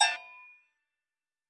Tweaked percussion and cymbal sounds combined with synths and effects.